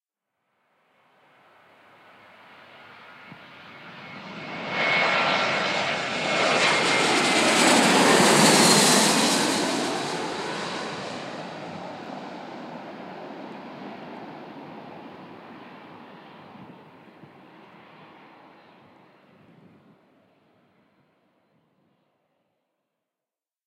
Plane Landing 03
Recorded at Birmingham Airport on a very windy day.
Aircraft, Airport, Birmingham, Engine, Flight, Flyby, Flying, Jet, Landing, Plane